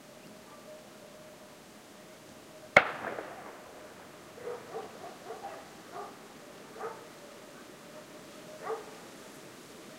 Single gun-shot, with some barking dogs in background. Primo EM172 capsules inside widscreens, FEL Microphone Amplifier BMA2, PCM-M10 recorder.
field-recording, gun, countryside